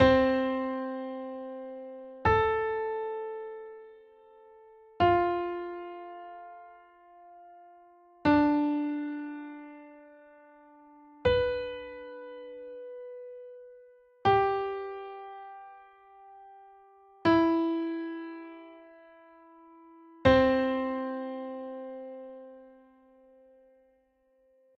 C MAJOR IONIAN IN STACKED 3RDS